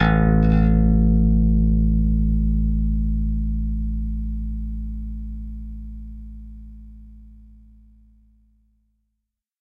Picked BassNote A
Ableton-Bass, Ableton-Loop, Bass, Bass-Groove, Bass-Loop, Bass-Recording, Bass-Sample, Bass-Samples, Beat, Compressor, Drums, Fender-Jazz-Bass, Fender-PBass, Funk, Funk-Bass, Funky-Bass-Loop, Groove, Hip-Hop, Jazz-Bass, Logic-Loop, Loop-Bass, New-Bass, Soul, Synth, Synth-Bass, Synth-Loop